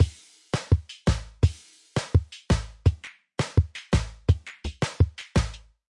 Chilly Billy 84BPM
A chilling drum loop perfect for modern zouk music. Made with FL Studio (84 BPM).
beat, drum, loop